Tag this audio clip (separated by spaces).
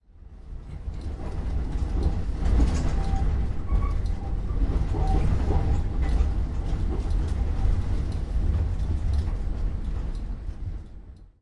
Pansk Czech Turn Tram Panska CZ